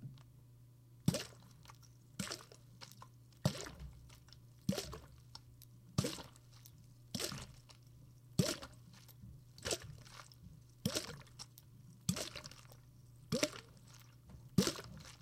water slushing slow and steady
water sloshing at a slow speed
slushing, water